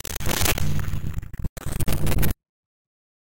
Viral Noisse FX 02